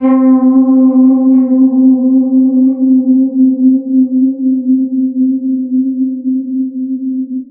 A ping that fades off. Made from FL studio, and a bit relaxing to listen to. Lasts for 7 seconds and pretty cool :D
Cool, Lasting, Long, Nice, Pling, Sound